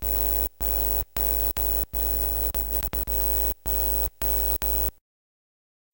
raf ritme 2
inspired by ryoji ikeda, ive recorded the sounding of me touching with my fingers and licking the minijac of a cable connected to the line-in entry of my pc. basically different ffffffff, trrrrrrr, and glllllll with a minimal- noisy sound...